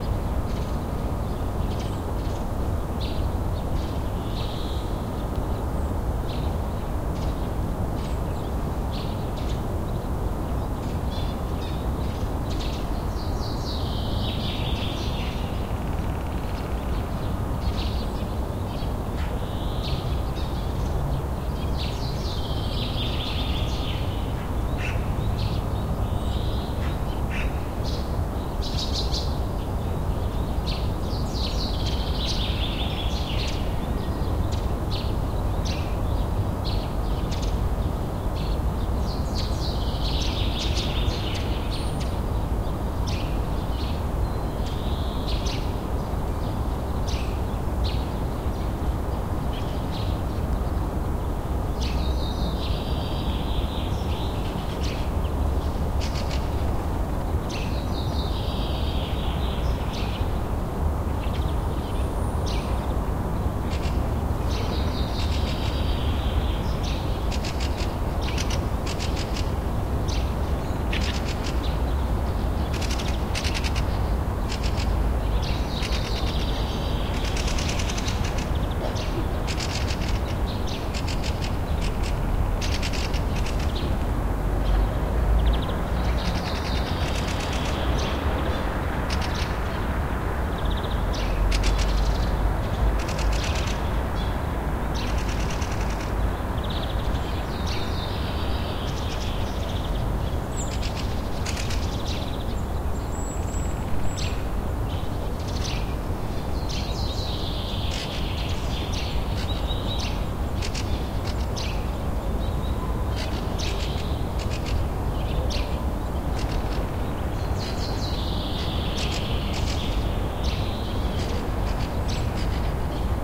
birds early morning 2
Birdsong early morning. Spring. Hum of city. Chirping of magpies.
Recorded 20-04-2013.
XY-stereo, Tascam DR-40. deadcat
rumble, early-morning, morning, spring